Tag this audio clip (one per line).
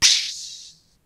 beatbox
bfj2
crash
cymbal
dare-19
hit